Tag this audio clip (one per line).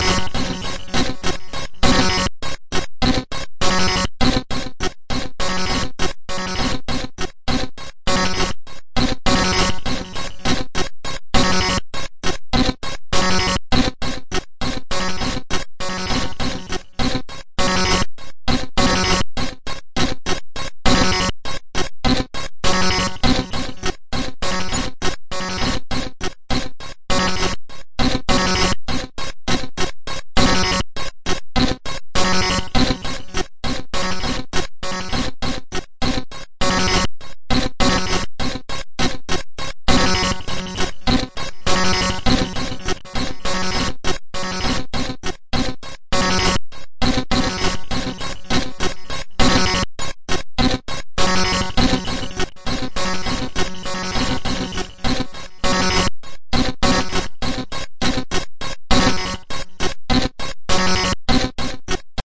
Alesis
glitch
percussive
circuitbent